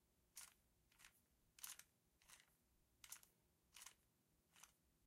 Spinning revolver cylinder 2
Quietly spinning through the chambers of a revolver. recorded with a Roland R-05